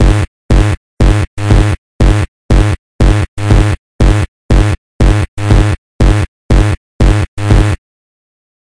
Ritmo métrica binaria de 4 pulsos y 4 compases. El sonido agudo marca la síncopa.
Síncopa --> 9
Binary metric rhythm of 4 pulses and 4 compasses. The high sound marks the syncopation.
Syncope --> 9